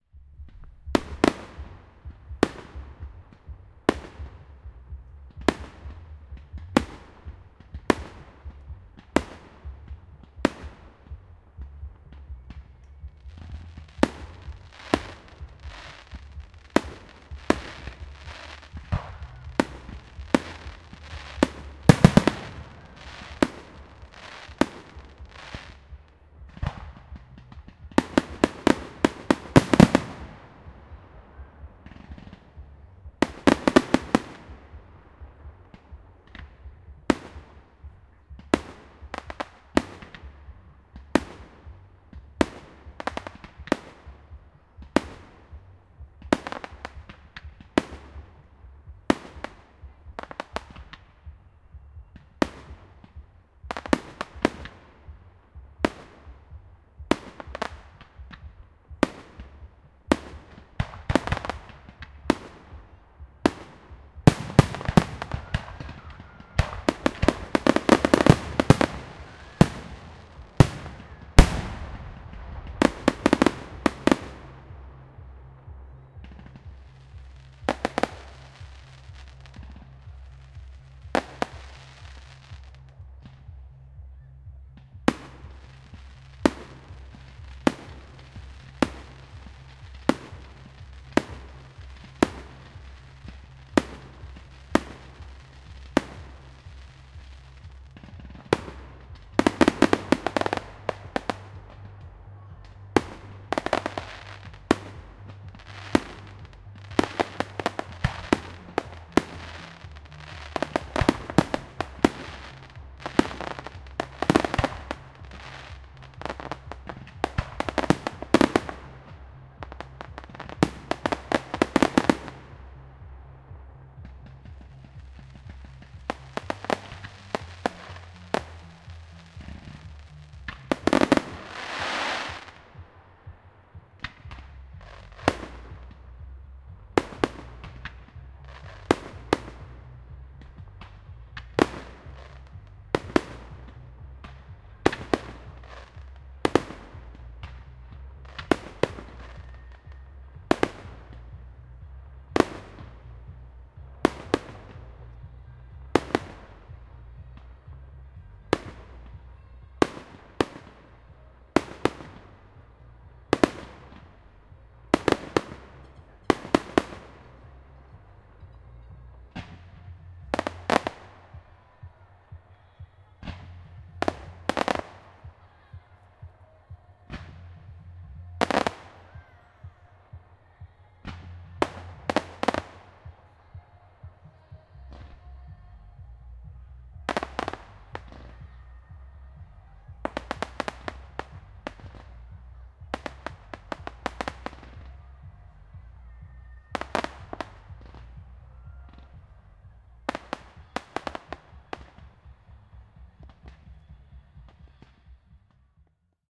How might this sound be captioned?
Raw audio of a fireworks display at Godalming, England. I recorded this event simultaneously with a Zoom H1 and Zoom H4n Pro to compare the quality. Annoyingly, the organizers also blasted music during the event, so the moments of quiet are tainted with distant, though obscured music. Crackling fireworks can be heard.
An example of how you might credit is by putting this in the description/credits:
The sound was recorded using a "H1 Zoom recorder" on 3rd November 2017.